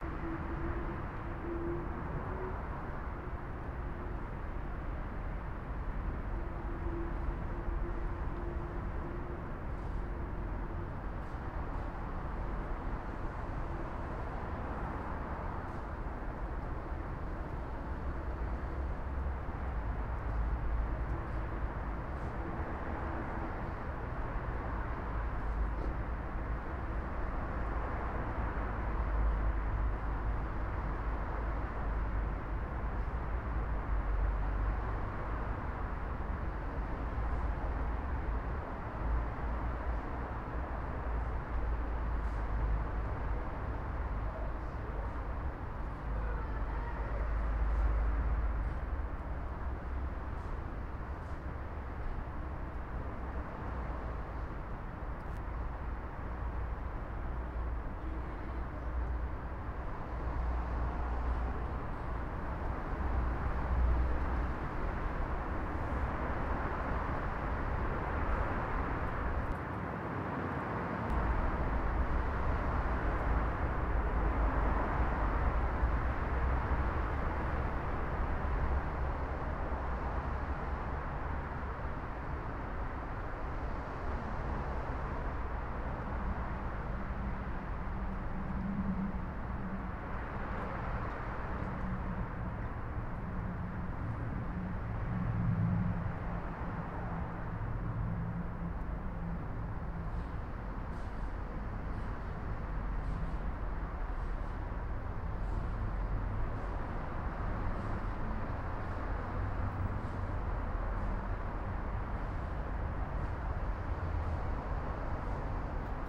ambience pittman 7pm
ambiance of 4 Pittman close, Ingrave, Brentwood, ESSES, CM13 3RR, UK at 7:11pm on 9th Feb 2008. AKG condenser mic outside window!
ambience, night, time, wind